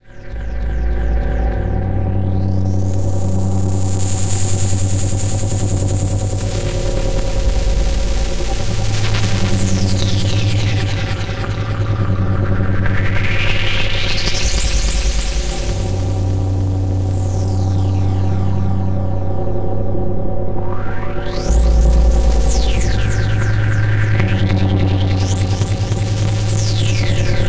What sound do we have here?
scaryscape bassfriedfilter
a collection of sinister, granular synthesized sounds, designed to be used in a cinematic way.
bakground, pad, film, monster, ambience, scary, granular, atmosphere, movie, noise, lullaby, fear, drama, creepy, criminal, cinematic, dark, bad, experiment, mutant, illbient, horror, effect, electro, filter, drone, alien, abstract, lab, ambient